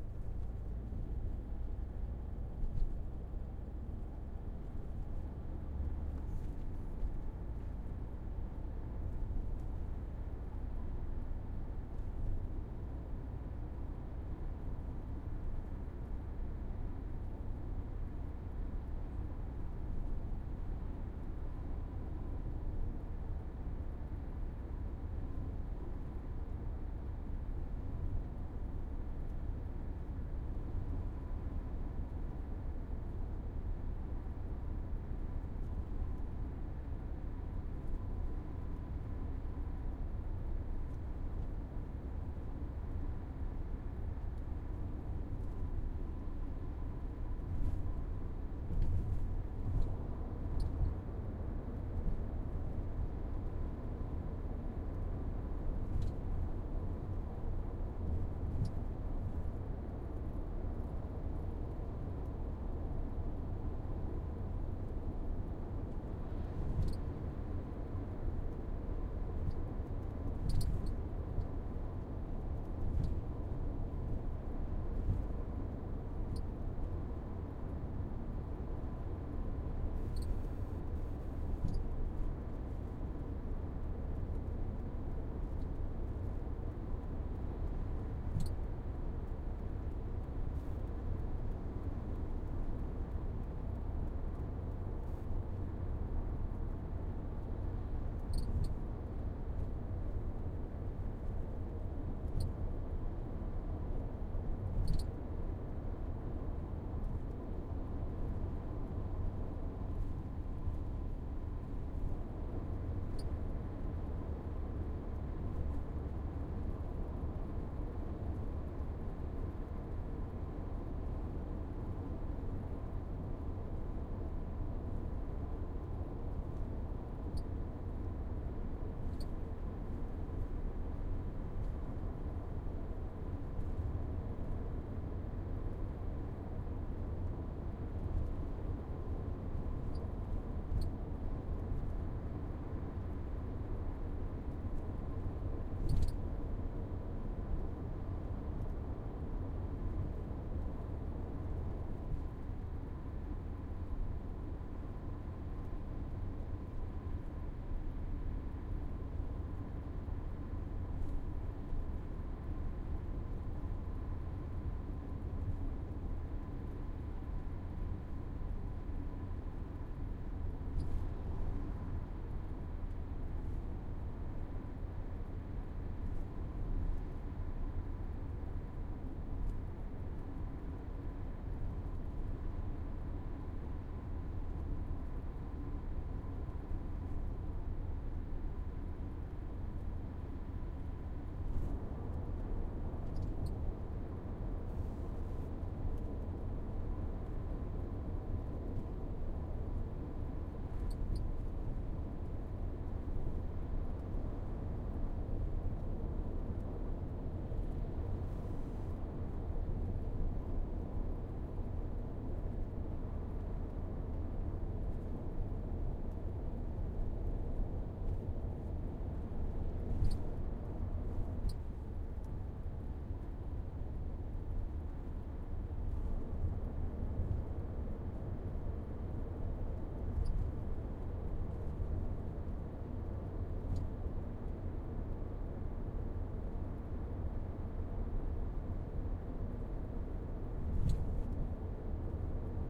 Inside Car on Motorway
A field recording of the interior of a car driving on a motorway recorded on a Zoom H8
GSPARRY, Zoom H8
cars, driving, inside